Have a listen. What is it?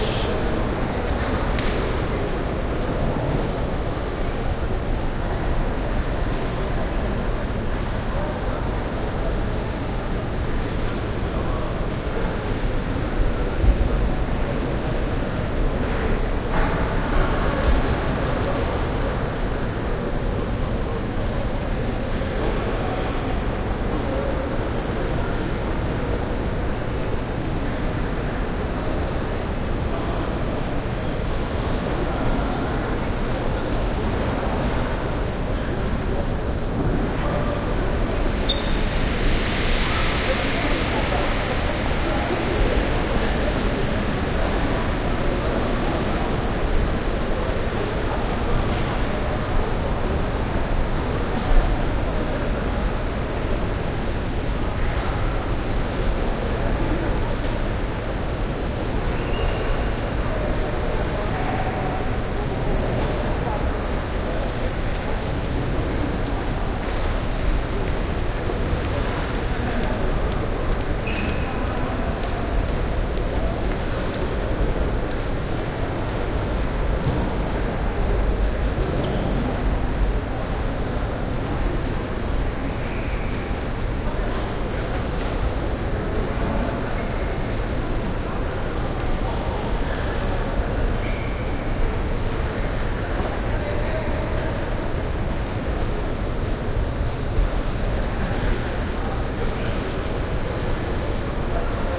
Binaural recording. Used in-ear microphones. It's the ambient sound of the Munich Central Station's waiting hall.